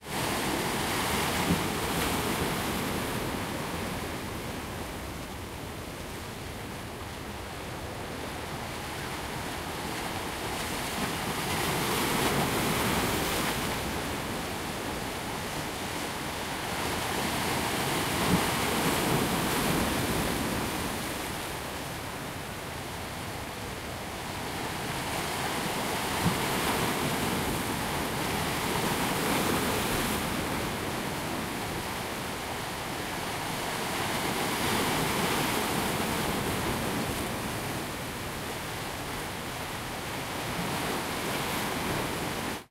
Taken with Zoom H2N, the beaches of Cyprus
beach, coast, ocean, sea, seaside, shore, water, wave, waves